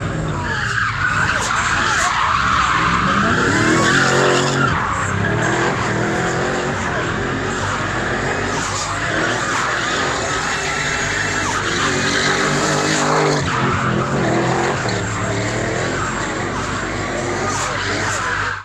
palenie opon
the car burns tires while drifting
samochód pali opony podczas driftu
motor, vehicle, field, burn, burning, drive, tire, show, sfx, drift, racing, tires, sound, effect, recording, drifting, fx, motors, driving, car, race, tyres, automobile, engine, motorshow